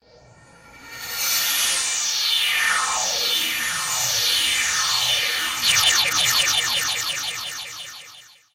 ONORO Julian 2014 2015 speedlight
Speedlight:
Using 3 tracks:
Sound recorded of a grill and then played in backforward:
Track 1
Effects:
Wahwah: Frequency LFO 0.2 Hz - Beginning phase 210 - Depth 92% Resonance 7,0 - Gap Frequency 8%
Track 2
Track duplicated.
Effect:
Delay: Delay Type: Regular-Delay per echo 6.0
Pitch: Pitch shift - Pitch change per écho tones .
Track 3
Effect Paulstretch
Stretch: 2 Resolution (seconds) 0,25 Echo: Delay time: 1second- Decay 0,5
Typologie: X+V
Morphologie:
Masse: son cannelé
Timbre harmonique: Brillant
Grain: lisse
Allure: Dynamique avec une petite partie avec vibrato.
Dynamique: Attaque graduel
Profil mélodique: Variations serpentines ascendantes
Profil de masse: Calibre aigu
fiction, Intergalactic, Space, Speedlight